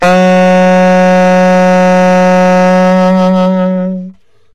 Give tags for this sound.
alto-sax; jazz; sampled-instruments; sax; saxophone; vst; woodwind

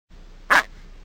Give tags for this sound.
quack; duck; a

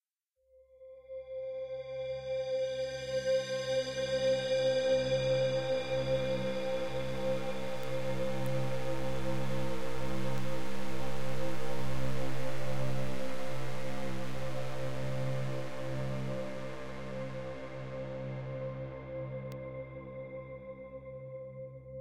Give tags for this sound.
background; evolving; alien; ambient

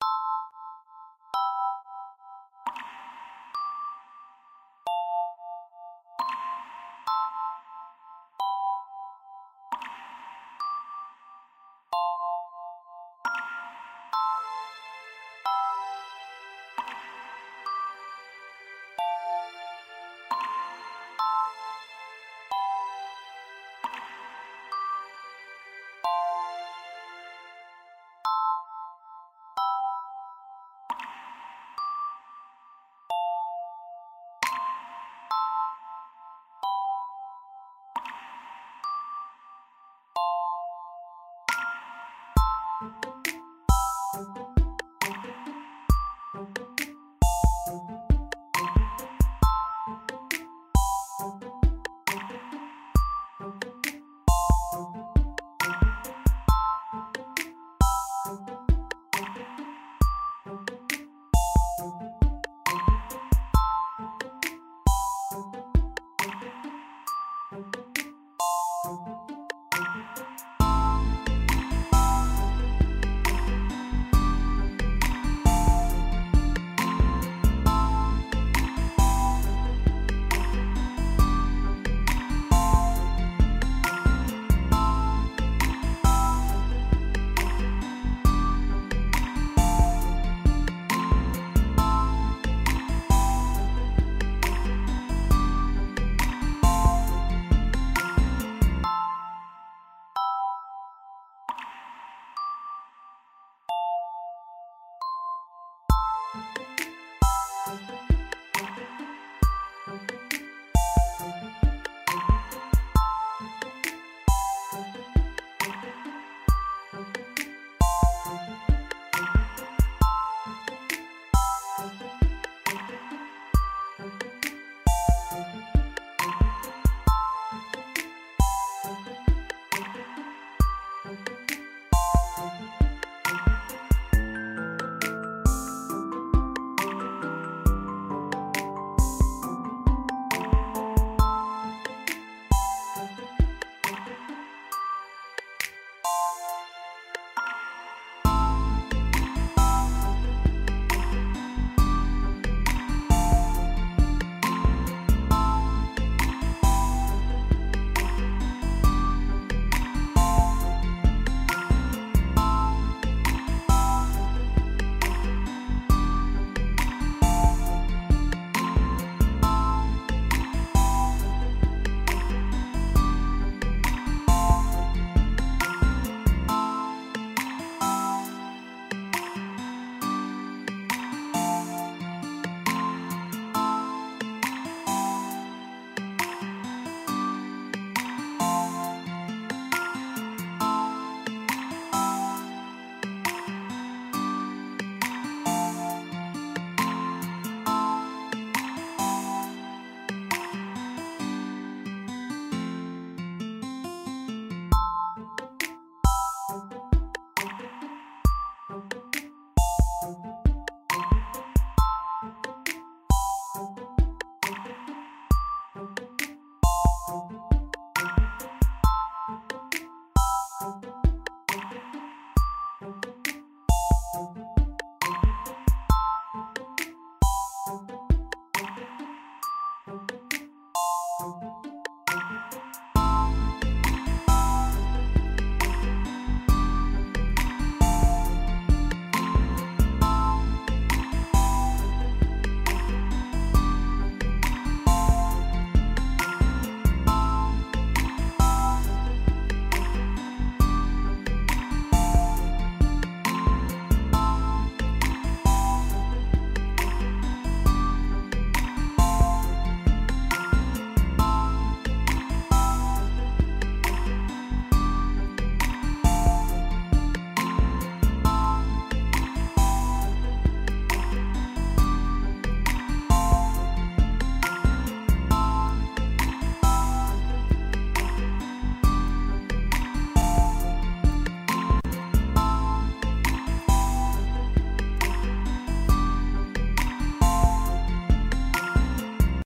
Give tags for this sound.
ambient; background-music; calming; creek; guitar; leak; liquid; music; passionate; relaxing; river; splash; stream; trickle; walk; water

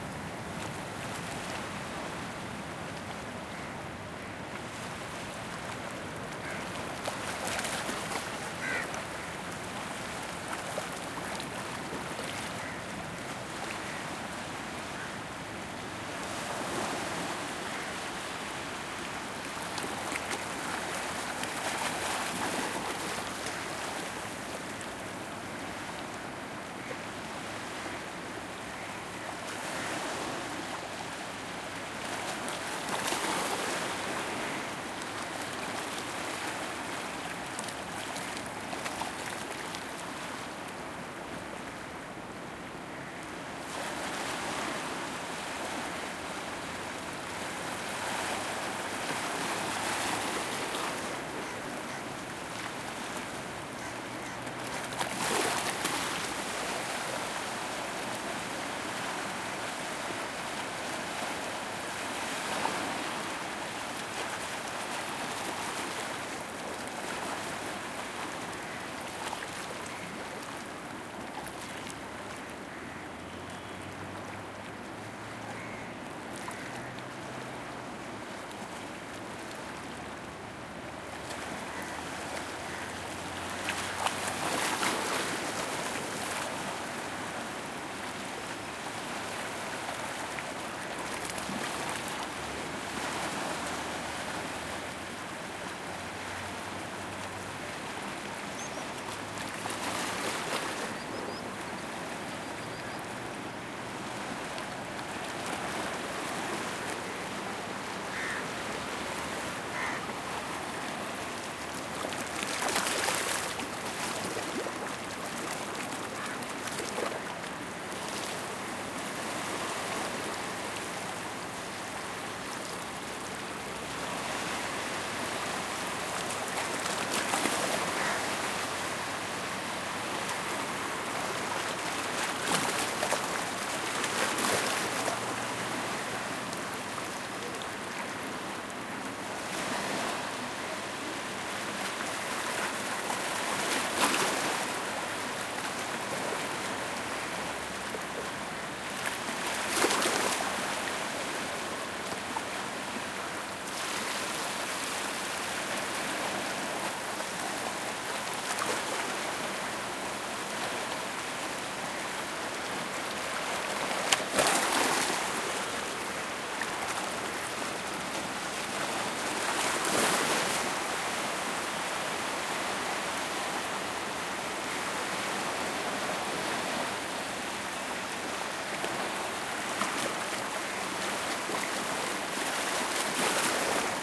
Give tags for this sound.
gentle; India; lake; medium; ocean; or; splashing; water; waves

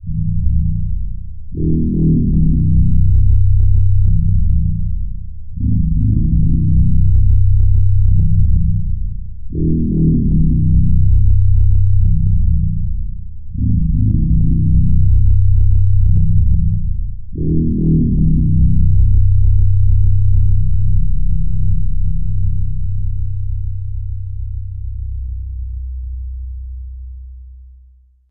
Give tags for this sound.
bass; heartbeat; low-frequency; sinister; tones

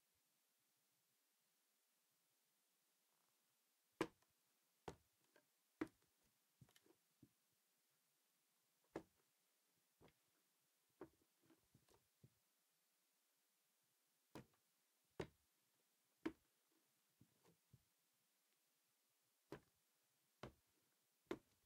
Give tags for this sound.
Foley; Wood

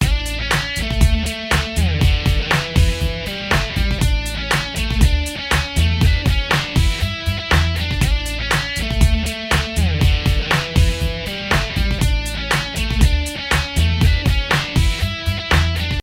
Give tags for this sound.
funky; music; sports; surf

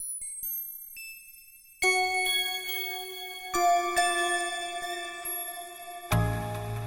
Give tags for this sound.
999-bpm; cinema; glitch; idm; melody; soundscape

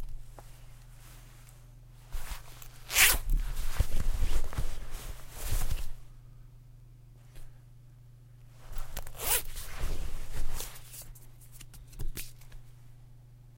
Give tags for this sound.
unzipping; zipping; card; zip; bag; zipper

